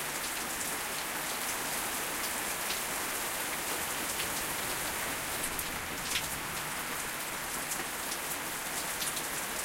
Short Rain Loop
A short loop of rain. The clip was recorded under a leaky, wooden deck so the loop isn't completely perfect, but it's close to seamless.
drip, loop, outside, rain, storm, water, weather, wet